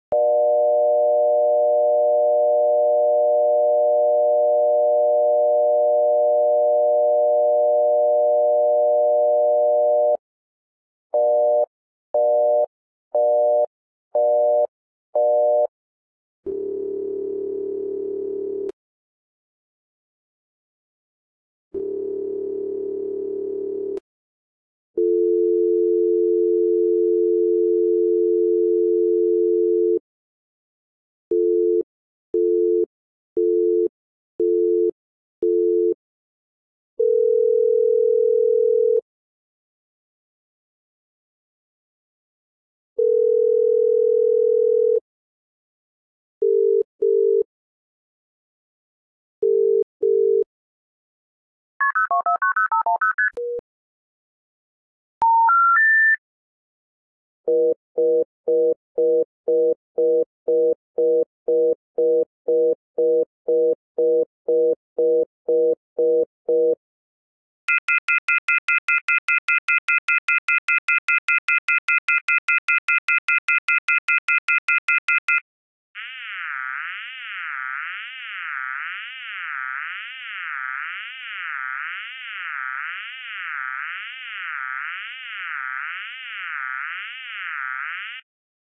Clean phone tones
Phone sounds. Everything but the phone. Old style US dialtones and modulated ringbacks - modern dial tones and ringbacks - SIT tones - an outpulse sequence (reminds me of the one from Pink Floyd the Wall) - off the hook warning sound - UK style ringback - engaged line tones - all created on SoundForge 8's Frequency Modulator ... oh yeah and they're squeaky clean... no static or grounding hums.